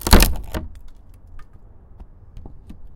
Tearing rotten wood 6
This is me in the garden tearing away rotten wood from my fence before fitting in new wood pieces.
Recorded with a Zoom H1.
breaking, cracking, creaking, destroying, rotten, rotten-wood, snapping, squeaking, tearing, wood